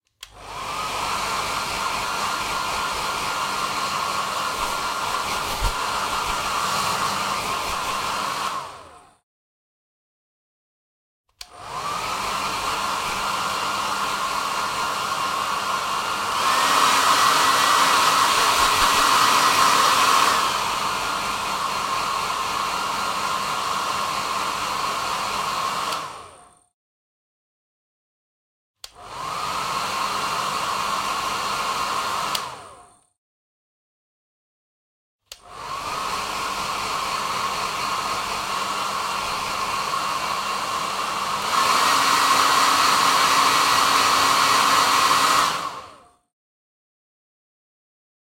10 - Hair dryer
Blowing of hair dryer. (more versions)
air, bathroom, blowing, cz, hair, hairdryer